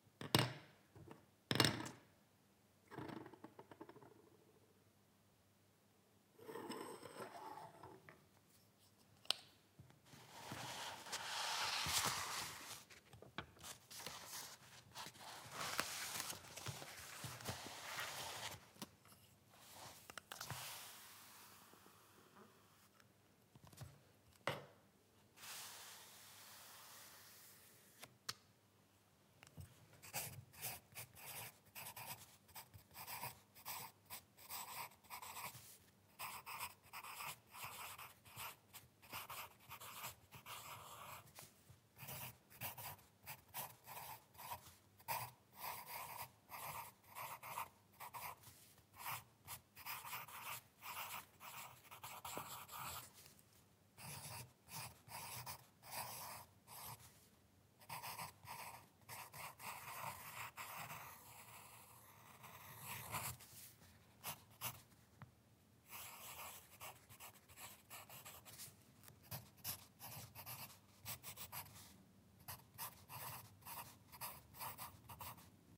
Writing on paper using a lead pencil. Dropping the pencil, picking it up, moving the paper, etc etc
Microphone: DPA 4017 (hypercardiod)
Paper, Pencil, Writing